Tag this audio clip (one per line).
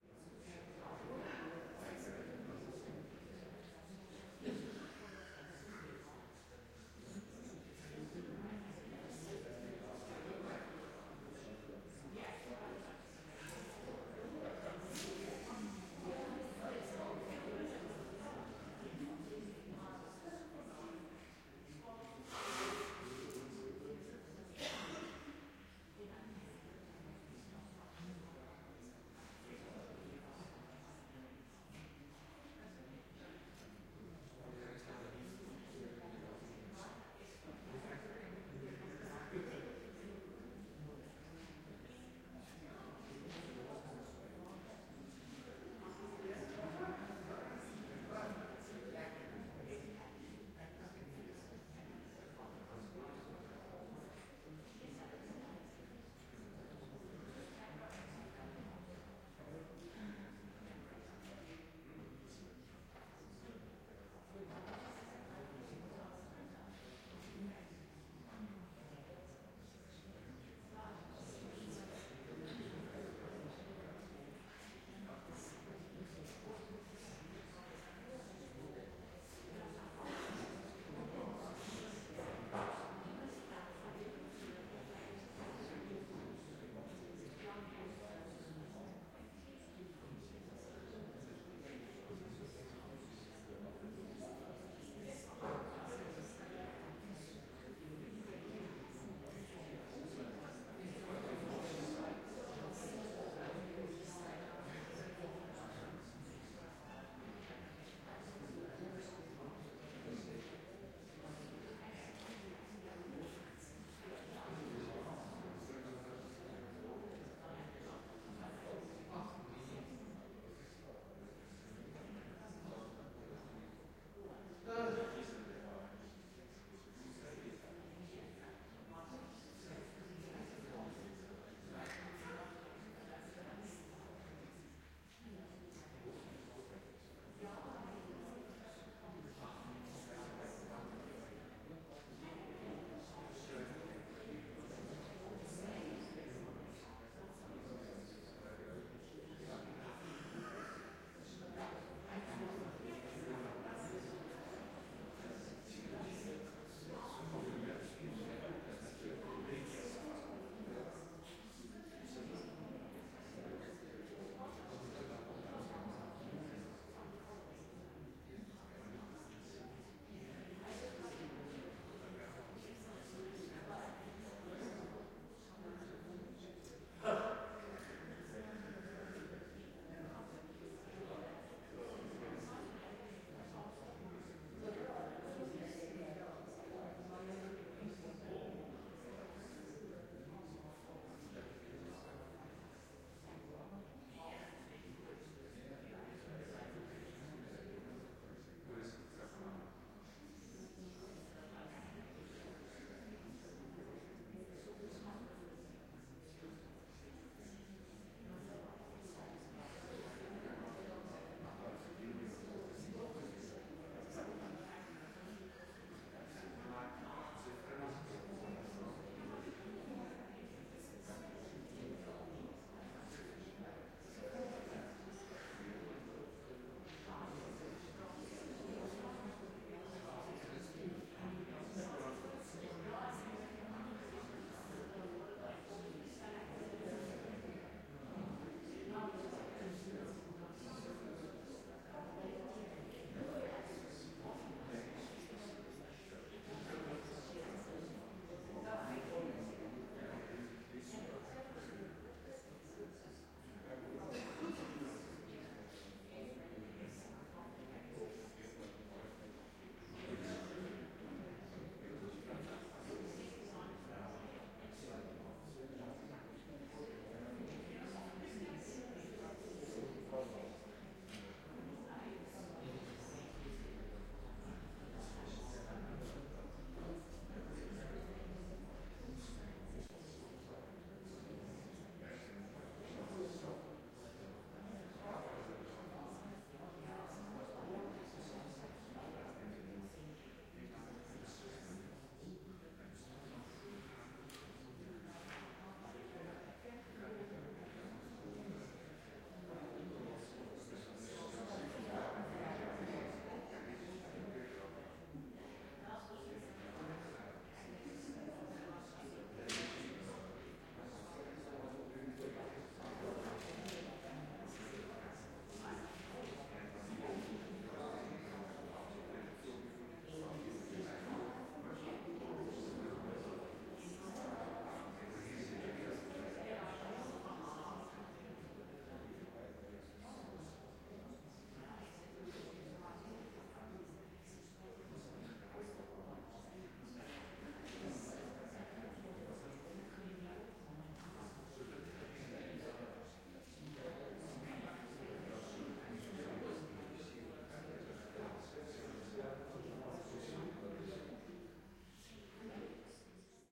6-channels
Afrikaans
background
background-noise
chat
chatter
chatting
concert
concert-hall
crowd
hall
MMS
musaion
reverb
surround
talk
talking